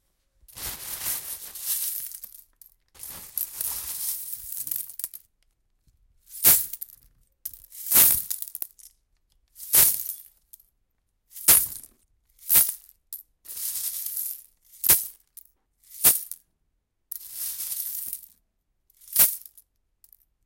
Dropping some seashells into a plastic bag. Recorded in a small room using Zoom's H1
plastic-bag, sea-shell, Foley